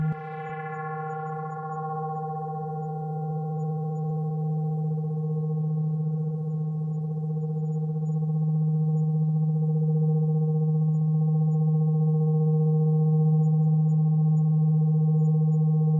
Stereo synth sub bass waveform recorded with analog synthesizer.